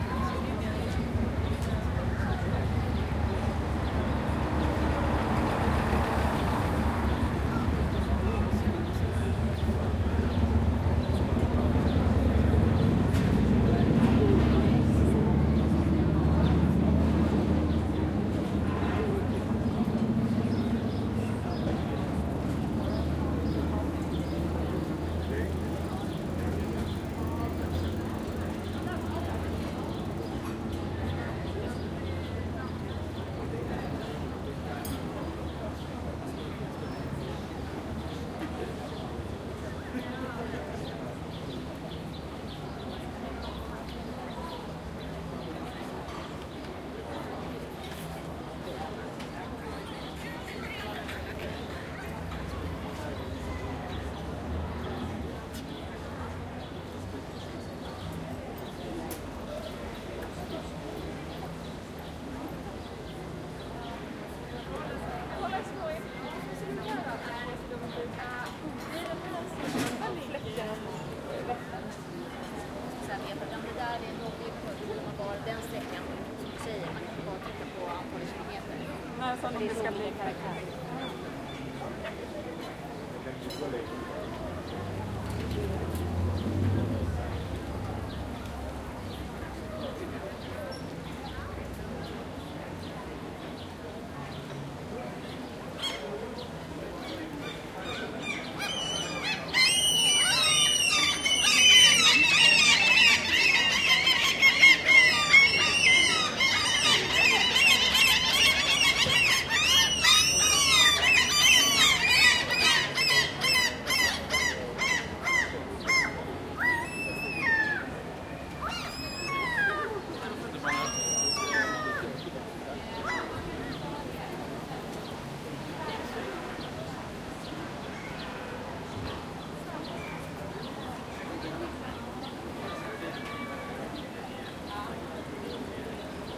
170717 Stockholm Nytorget F
General soundscape of the Nyrtorget in Stockholm/Sweden, a young and trendy little area with a small park and playground, lots of cafés, and full of young people and children playing and relaxing. It is a sunny afternoon and there is a large amount of pedestrian and some automobile traffic underway. At the end of the recording, a fierce altercation ensues between a number of seagulls about some morsel tossed onto the street from a nearby café... The recorder is situated at ear level on the sidewalk of the Skanegatan, facing into the center of the street, with the playground and park in the rear and some cafés in the front.
Recorded with a Zoom H2N. These are the FRONT channels of a 4ch surround recording. Mics set to 90° dispersion.
people, cars, urban, field-recording, street, cafe, surround, traffic, busy, city, Stockholm, ambience, park, seagulls, Europe, bicycles, Sweden, children